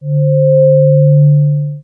slobber bob F2
Multisamples created with Adsynth additive synthesis. Lots of harmonics. File name indicates frequency. F2
additive, bass, metallic, multisample, swell, synthesis